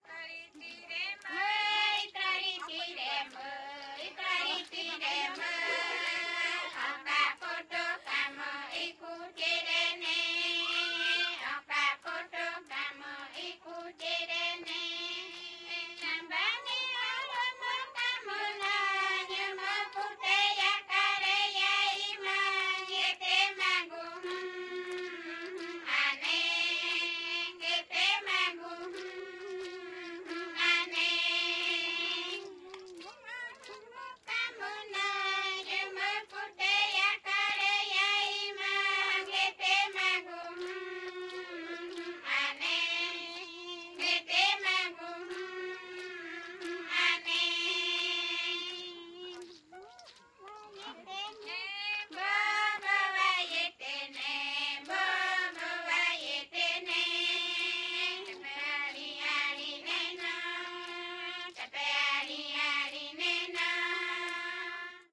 Nira's Song number 6 from the "Kayapo Chants". Group of female Kayapó native brazilian indians finishing the ritual of the warrior, in "Las Casas" tribe, in the Brazilian Amazon. Recorded with Sound Devices 788, two Sennheiser MKH60 in "XY".
chant, indian, rainforest, female-voices, native-indian, brasil, ritual, tribe, kayapo, amazon, voice, music, caiapo, tribo, tribal, field-recording, indio, brazil